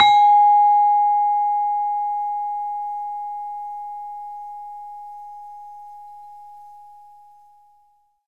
Sample of a saron key from an iron gamelan. Basic mic, some compression. The note is pelog 4, approximately a 'G#'